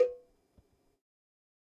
MEDIUM COWBELL OF GOD 003
cowbell, real, kit, drum, more, god, pack